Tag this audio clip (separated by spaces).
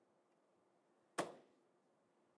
deep thud impact